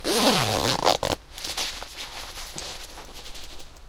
06.01.2016, outside
Recorded with a crude DIY binaural microphone and a Zoom H-5.
Cut and transcoded with ocenaudio.